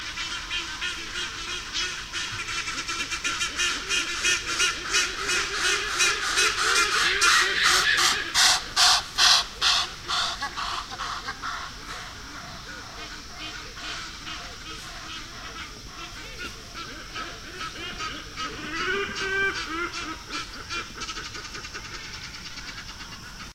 Hornbill flyby
A recording of a black and white casqued hornbill as it flew overhead. This was taken around 6.30am in the gardens of a holiday resort on the shore of the island of Bugala, Uganda. Nice surround sound quality. Recorded on Zoom H2
bird, bugala, hornbill, kalangala, nature, uganda, wildlife, zoomh2